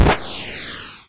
Sounds like when one lets go of a balloon that they're pinching shut and it flies around as it's deflating, or that sound could resemble some sort or rocket-launcher being fired. Created using SFXR most likely by clicking the "randomize" button
8-bit, 8bit, arcade, Firearm, Game, Gun, retro, sfx, sfxr, Shoot, Shooting, Spring, Video, Video-Game